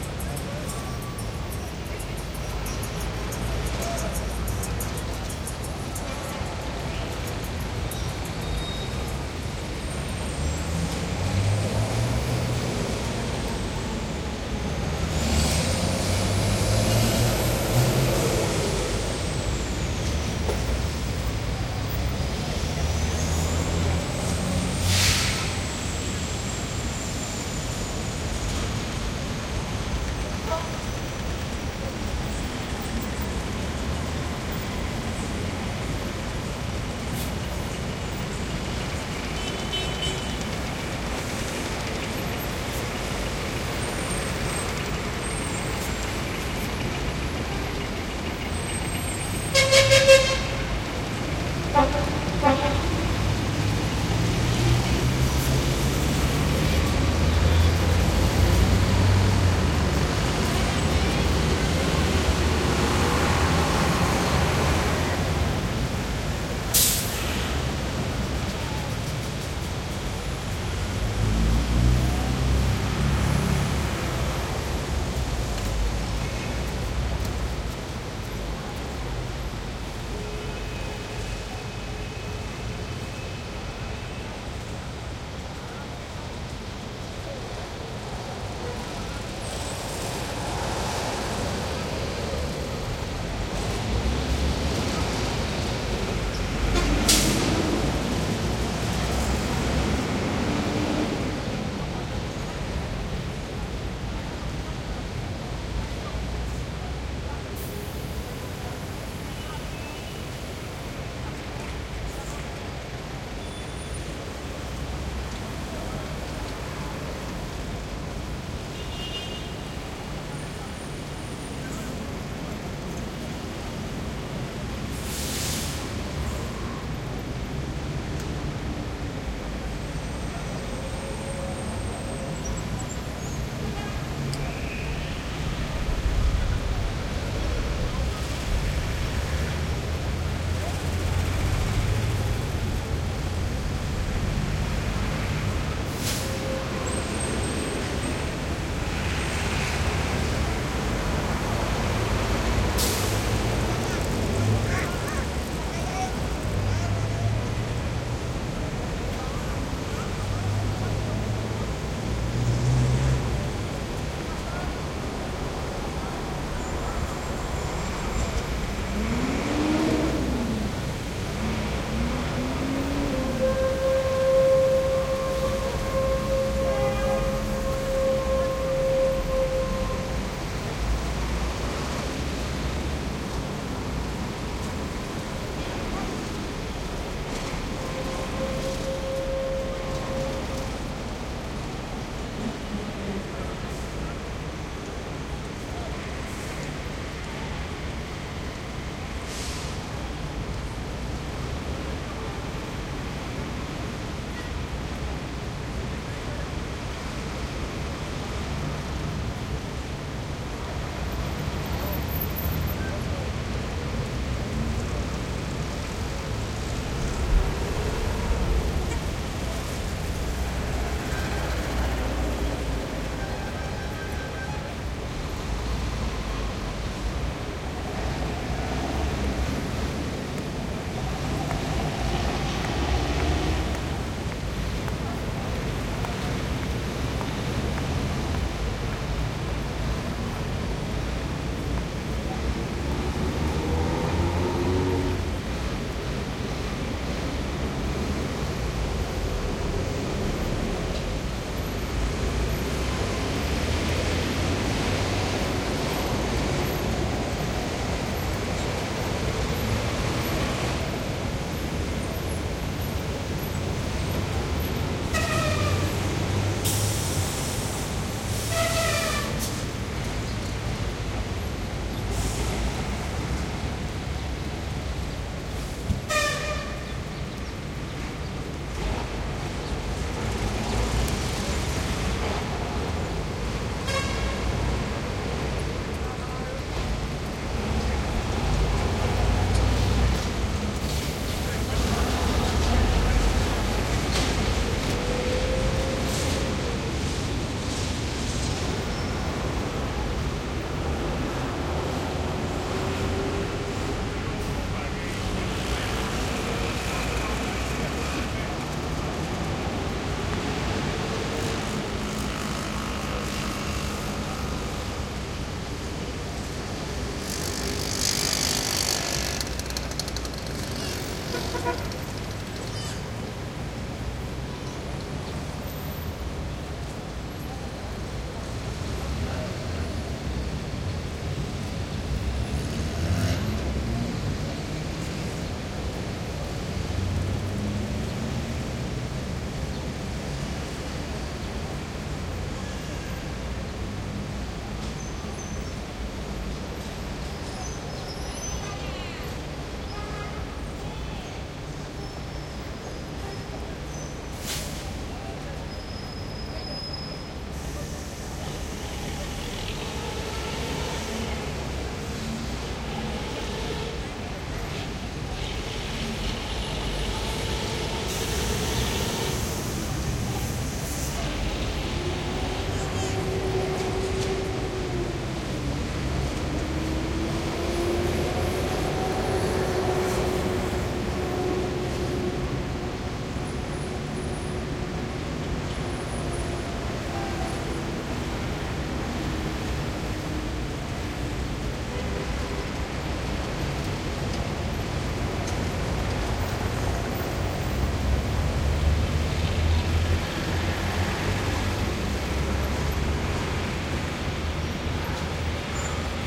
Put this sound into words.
traffic med around city square cuba
traffic and people hummin around big open city square in Havana
city, cuba, med, square, traffic